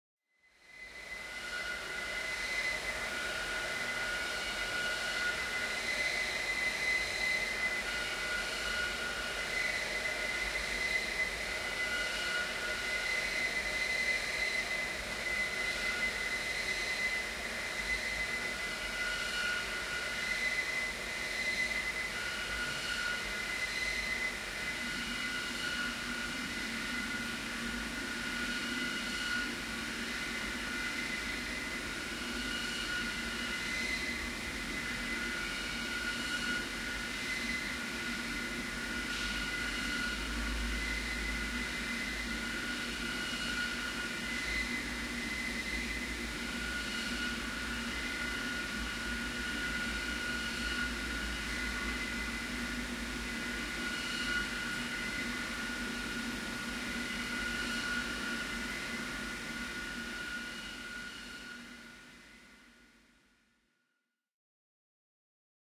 Some distant "howling" machine.
Recorded with Lenovo P2 smartphone.